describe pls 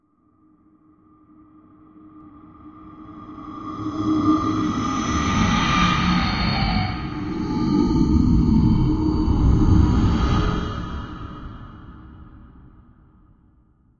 Sort of an unearthly drone, with a bit of a growl near the end.
scary, drone, unearthly, creepy